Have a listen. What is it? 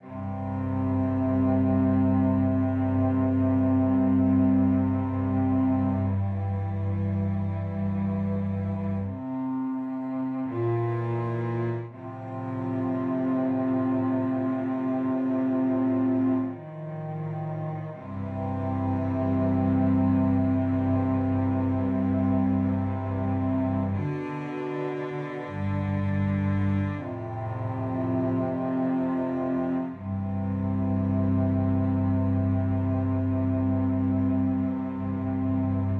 Song7 STRINGS Do 3:4 120bpms
120, beat, blues, bpm, Chord, Do, HearHear, loop, Piano, rythm